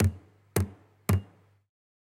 15. knocking with the milk can
knocking a steel milk can filled with milk to remove the bubbles